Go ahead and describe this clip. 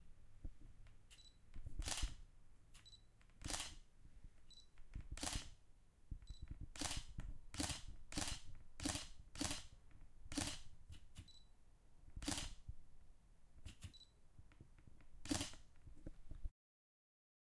A cannon camera focusing and taking a picture